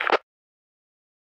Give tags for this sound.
end; noise; radio; sign-off; sound; squelch; static; transmission; walkie-talkie

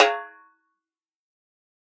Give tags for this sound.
1-shot; drum; multisample; snare

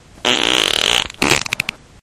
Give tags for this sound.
laser; poot; flatulation; flatulence; fart; space; race; gas; noise; car; nascar; aliens; snore; weird

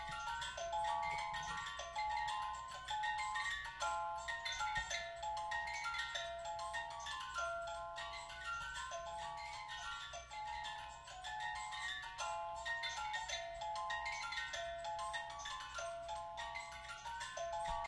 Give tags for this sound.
3D; binaural; binaural-imaging; dummy-head; front-back-localization; headphones; horizontal-localization; localization; out-of-head-localization; sound-localization; test

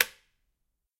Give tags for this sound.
button; click; short; switch

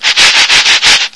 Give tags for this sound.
gourd; ground; handmade; invented-instrument; shaker

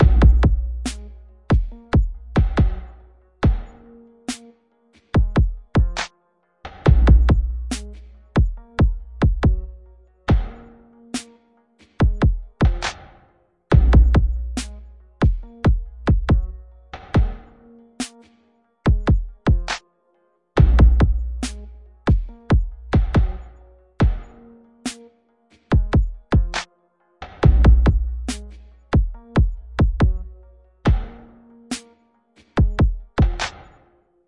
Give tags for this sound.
beat-drum-loop-loop; rhythmic; percussion-loop

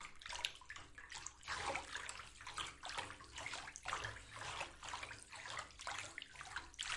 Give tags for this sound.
squelch; swimming; water

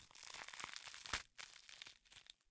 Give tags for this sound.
gore; flesh; tear; blood; intestines

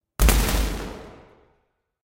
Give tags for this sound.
battle; bomb; boom; fireworks; bang; mortar; impact; grenade; explosive

